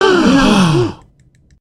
14 voices gasping; they're all mine, though. (First effort at creating sound effects)